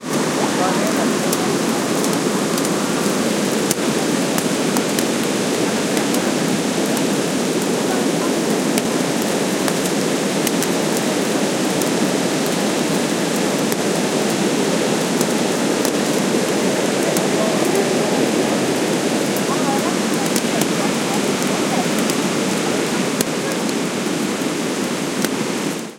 20160309 09.waterfall.closeup.n.rain
At close distance, waterfall noise + noise of raindrops falling on my umbrella. Some talk can also be heard. PCM-M10 recorder, with internal mics. Recorded on the Brazilian side of the Iguazú waterfalls.
rain, water